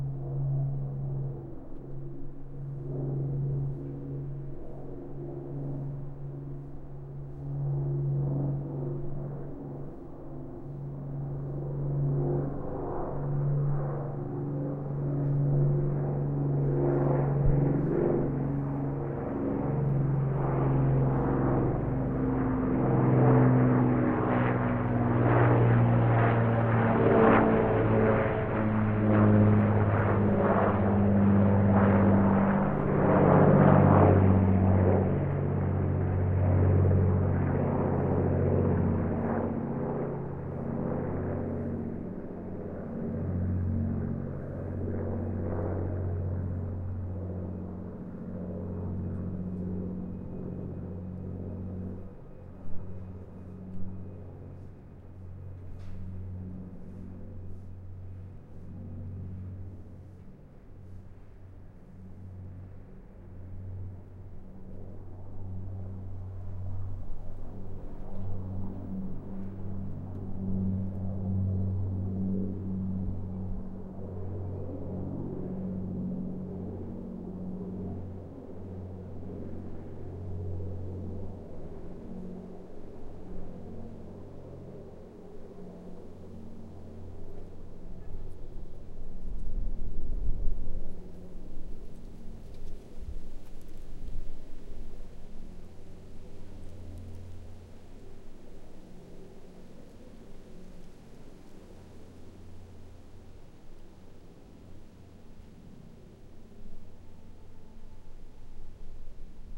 AIR Lancaster By1
Clean Fly-by of the last working WWll Lancaster Bomber four engine propeller airplane
recorded over Toronto with Tascam DR100, Neuman KM150 stereo pair.
airplane, Bomber, Fly-by, four-engine, Lancaster, propeller, WWII